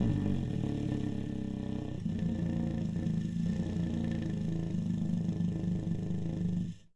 sub-t long HO 1.R
recordings of variouts trumpet extended techniques, performed by David Bithell, recorded by Ali Momeni with a Neumann mics (marked .L) and an earthwords (marked .R). Dynamics are indicated with from pp (very soft) to ff (very loud). V indecas valve, s and l indicate short and long, pitches in names indicate fingered pitches,
davood, technique, low, subtone, trumpet, extended